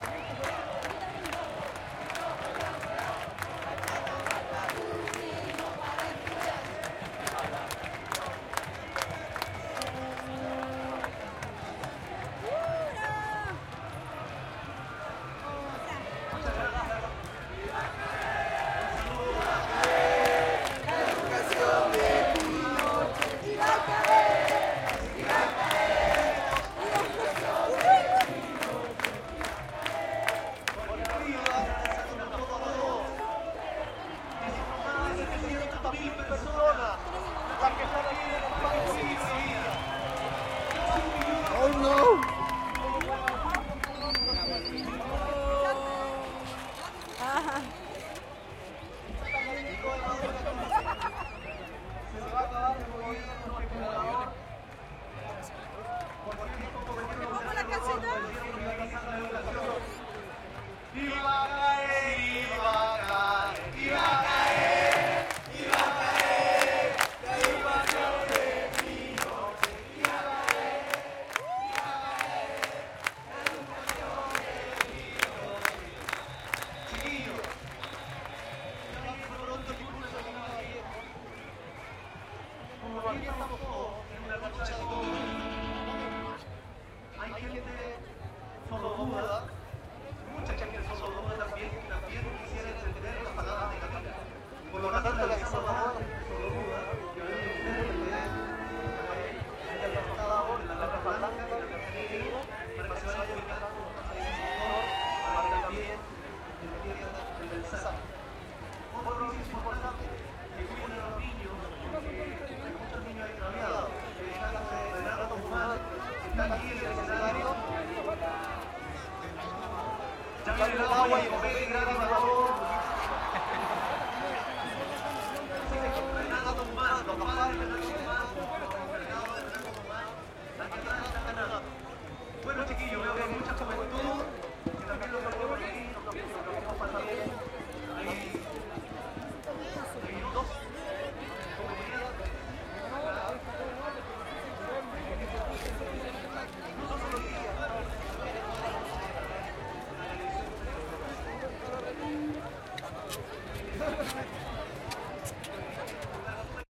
domingo familiar por la educacion 07 - y va a caer
hay plata pal papurri y no para estudiar
y va a caer la educacion de pinochet
chile, crowd, domingo, educacion, estudiantil, familiar, movimiento, ohiggins, park, parque, publico, recital, santiago